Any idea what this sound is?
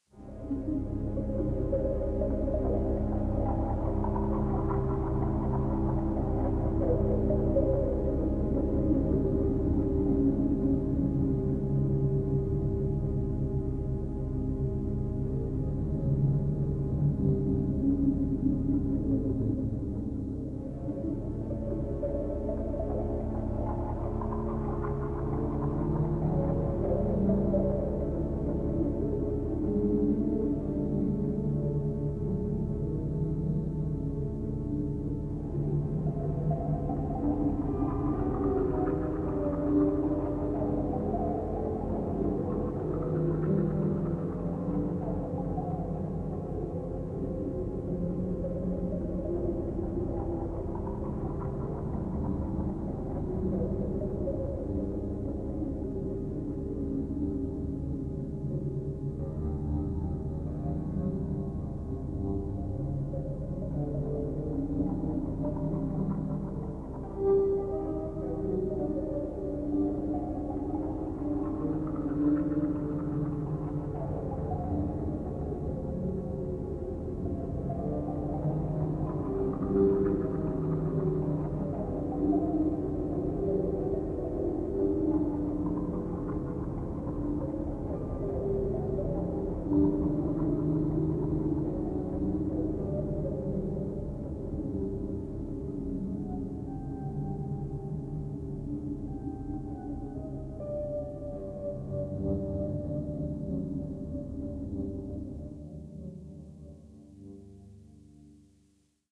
Dark Ambient - Pad
Another dark ambient done with a Legowelt sample, wich consist of sounds from the Roland JV 2080. Also used my Kurzweil SP4-8 keyboard. Hope you like it.
Environment, Atmosphere, Dark, Ambient, Horror, Drone, Scary